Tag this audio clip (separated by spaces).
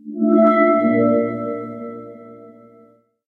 harp transformation set